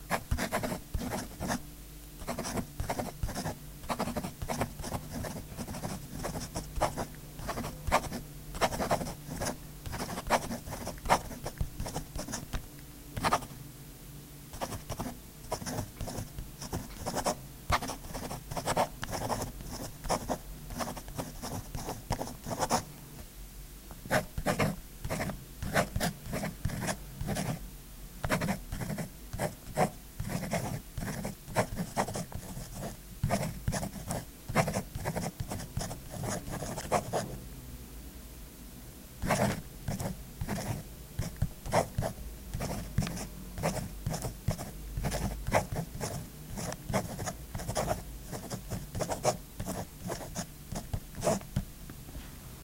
Writing with Fountain Pen Nib
Writing lines from a few poems on a fountain pen nib on thick paper. Recorded with a Shure SM57 through Roland R-26.
pen, writer, scribble